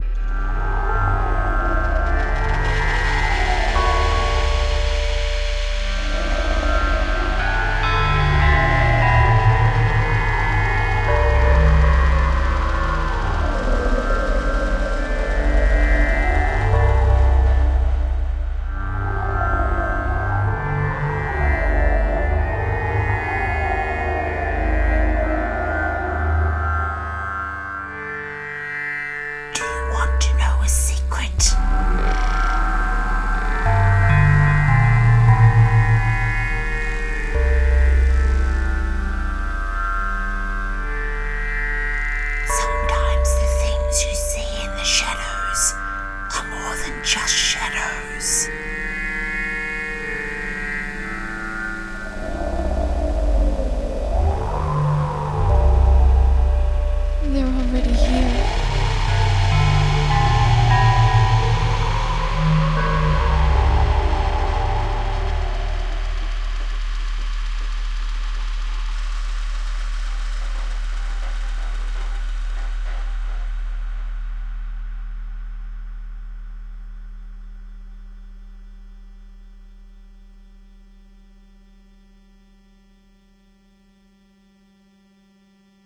Already here...
Track I did on FLStudio.
Attribrutions:
I mostly do music,but have made some pretty bizzare soundscapes.
Creepy, ect, Horror, Scary